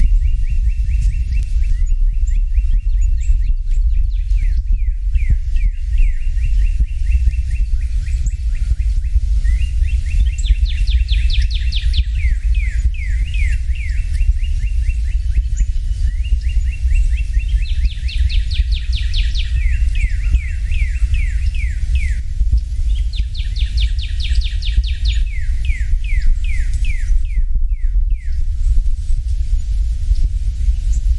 SpringBirds-2 (31sec Loop)
Mastered, Hz, Effects, Loop, Birds